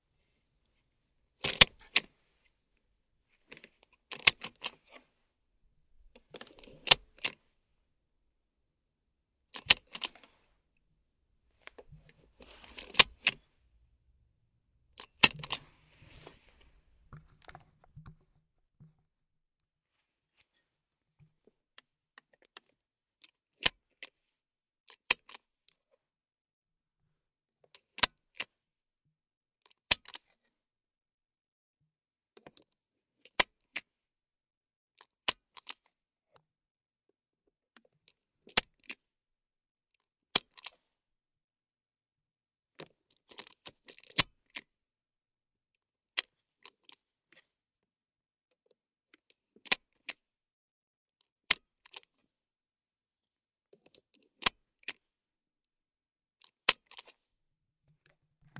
Miscjdr Car Seatbelt buckle and unbuckle
Honda Fit Seatbelt Movement